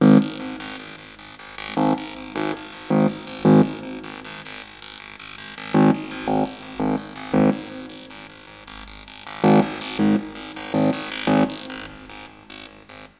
random15 - electricity
my own amazing beatboxing skills with some magic.
electric, electricity, random, voltage